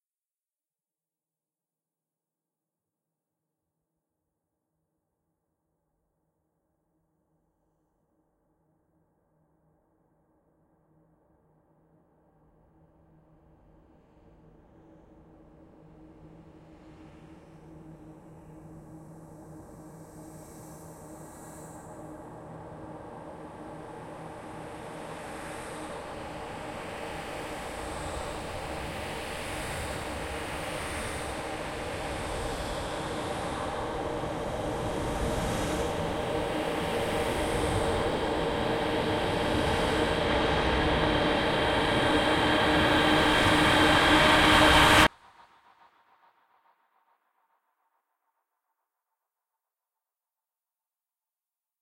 Horror Build up 5 Creeping Dread
Horror Build up Riser Sound FX - created by layering various field recordings and foley sounds and processing them.
Sound Design for Horror